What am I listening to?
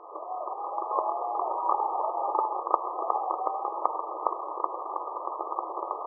woo owl glitchy broken fantasy scifi
broken, fantasy, glitchy, owl, sci-fi, scifi, woo